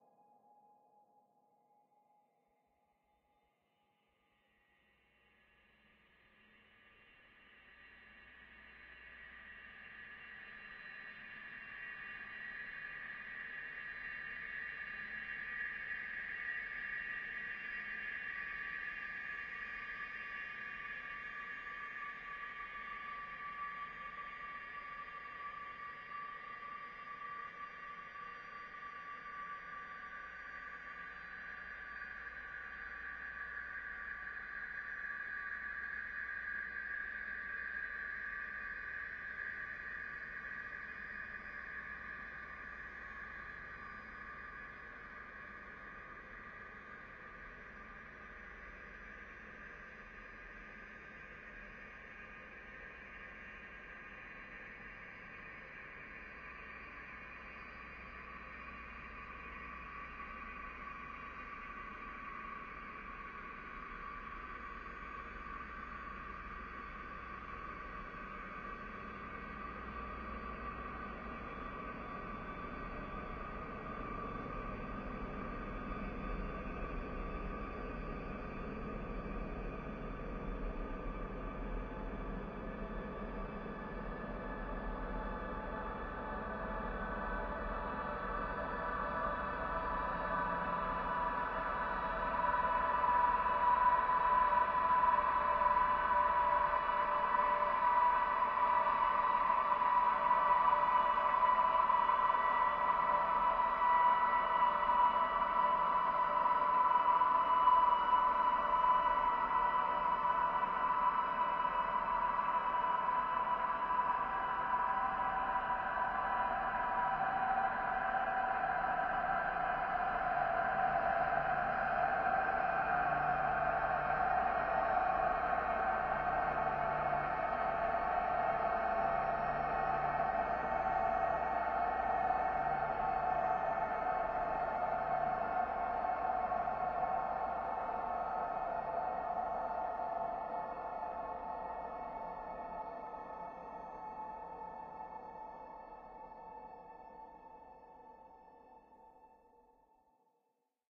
LAYERS 008 - MegaDrone PadScape - A7
LAYERS 008 - MegaDrone PadScape is an extensive multisample package containing 97 samples covering C0 till C8. The key name is included in the sample name. The sound of MegaDrone PadScape is already in the name: a long (over 2 minutes!) slowly evolving ambient drone pad that can be played as a PAD sound in your favourite sampler. It was created using NI Kontakt 3 within Cubase and a lot of convolution (Voxengo's Pristine Space is my favourite) as well as some reverb from u-he: Uhbik-A.
DEDICATED to XAVIER SERRA! HAPPY BIRTHDAY!
ambient, multisample, artificial, pad, soundscape, drone, evolving